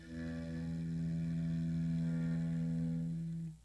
bowed E quiet
Recorded on a Peavy practice amp plugged into my PC. Used a violin bow across the strings on my Squire Strat. This is the lower (open) E note.
bowed, electric, experimental, guitar, note, real, string